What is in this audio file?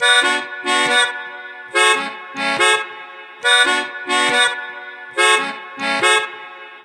DuB HiM Jungle onedrop rasta Rasta reggae Reggae roots Roots